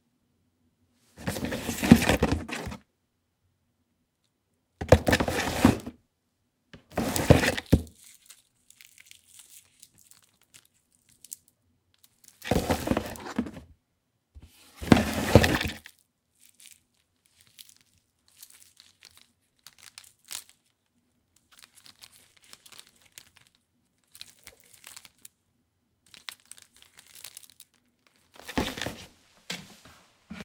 Pulling object (cord-cable) from a cardboard box
Pulling object (extension cord/cable) from a cardboard box, then handling the cord, a bit.
box, cardboard, cord, handling, pulling